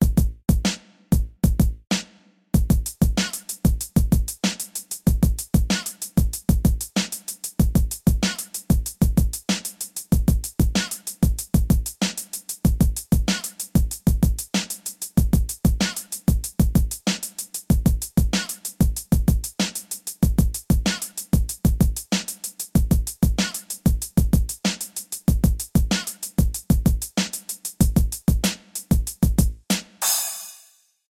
Old Hip hop drum beat
130-bpm, 95bpm, bass, beat, boombap, bottle, break, breakbeat, cleaner, container, dance, dnb, drum, drum-loop, drums, food, funky, groovy, hiphop, loop, percussion-loop, plastic, quantized, rubbish, sandyrb, stand, sticks, vacuum, water